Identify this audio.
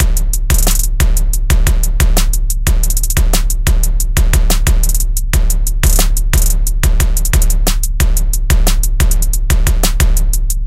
Trap drum loop